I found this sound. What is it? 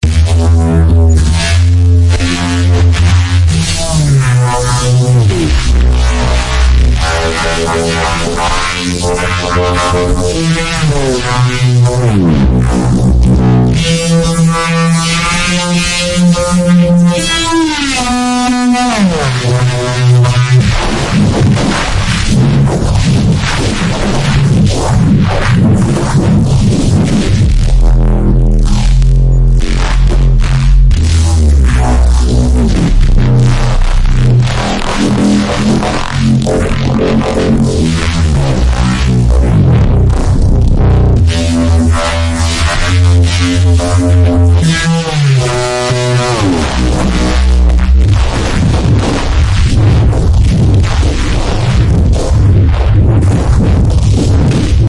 Bass 09 - Orchestral
Giant bass sample made entirely out of distorted orchestral instruments in FL12.
(Contrabass, Trombone, Clarinet, and Timpanis in that order. Repeats at lower octave)
FLStudio12, 140BPM, Bass